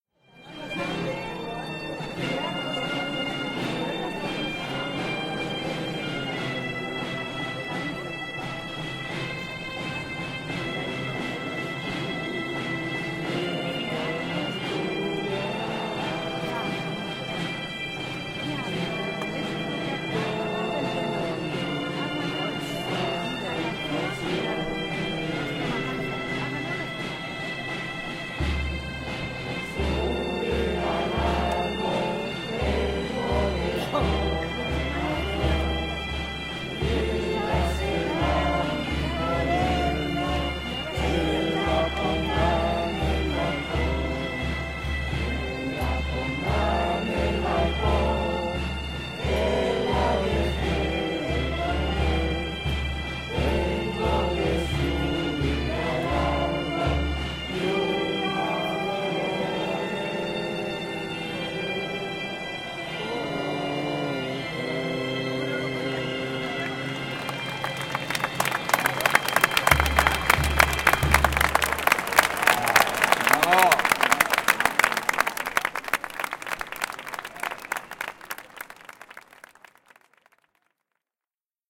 Public singing
This is a recording of a spanish folk group, made in Madrid (Plaza Mayor).
community, public